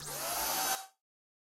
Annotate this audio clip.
From a vacuum.